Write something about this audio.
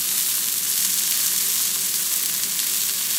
A looped (and marked) sound of a beef medallion cooking on a high heat. A very simple recording and one of the few issues of my uploads is not bulk uploading sounds of general use.